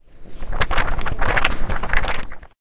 effect wahwah frequency 0,7 phase of starting 250 deep 41 resonance 2,1 frequency wah 59%. Fade in of 0 to 1 seconde. Fade out of 4 to 5 seconde. Change tempo speed 105,883. Normalize